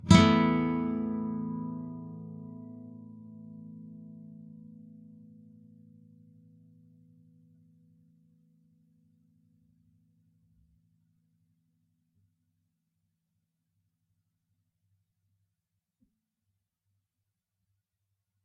Aadd2 thin strs
Standard open Aadd2 chord but the only strings played are the E (1st), B (2nd), and G (3rd). Up strum. If any of these samples have any errors or faults, please tell me.
clean, nylon-guitar, open-chords